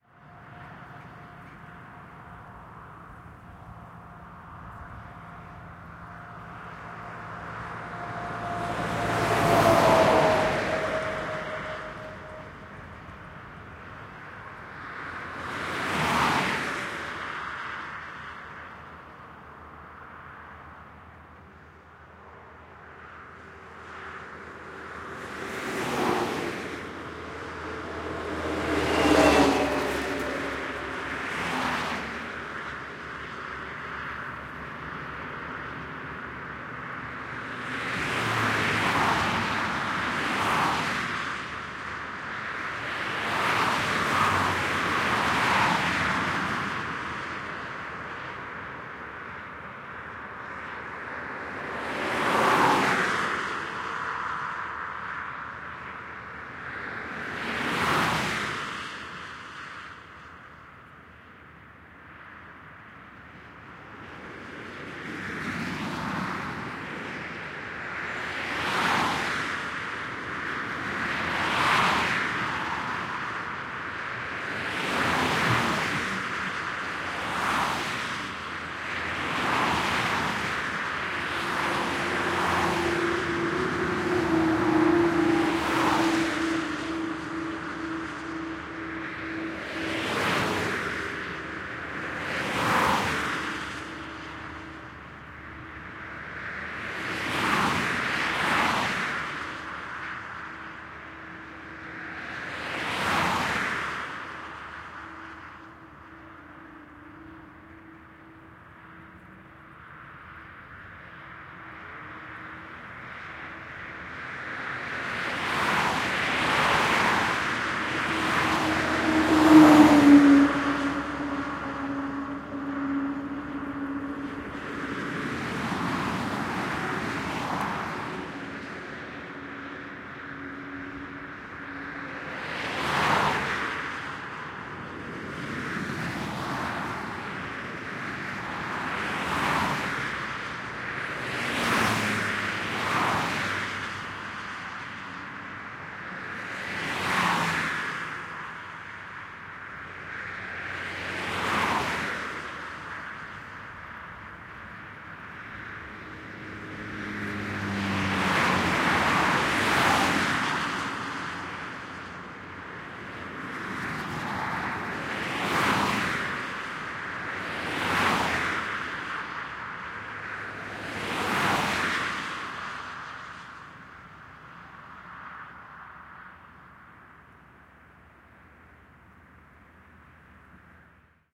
HIGHWAY TRAFFIC passby of cars, trucks and motorbike - 03 meters
Highway traffic, multiple passby of cars, trucks and motorbike, recorded at different distances, stereo AB setup.
Recorded on february 2018, CAEN, FRANCE
Setup : AKG C451 AB setup - Sounddevices 442 - Fostex Fr2le
field-recording, road, cars, Highway, passby, motorbike, traffic, trucks